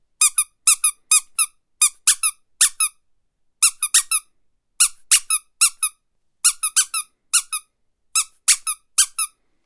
rubber duck small 1
duck, rubber, squeek